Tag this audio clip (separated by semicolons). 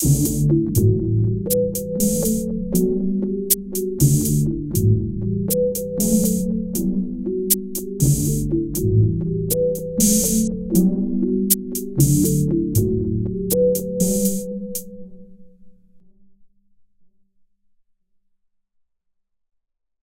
gentle; loop